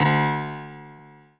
Piano ff 018